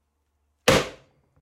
The sound of my hatchet hitting a piece of scrap on my workbench. This is, in my opinion, a very accurate hatchet sound.
thump, cut, axe, bam, chop, hack, bang, ax, hatchet, cutting, chopping